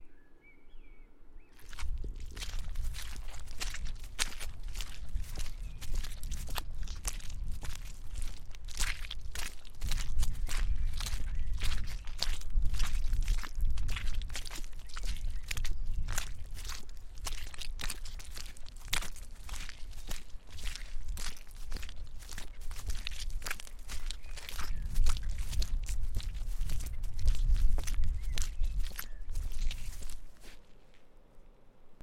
walking through a muddy field - mic following with the feet to give a mono track that could be used instead of a foley recording.
recorded with a ME66 onto Tascam DR40 at 48Kh.
there is a little wind rumble and some distant birdsong (blackbird - UK)
walking, people, mud